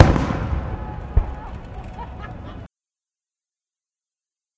hit with distant laughter
recording of a firework explosion with some distant single laughter
cheer, crowd, distant, ecstatic, explosion, loud, outside, people, scratch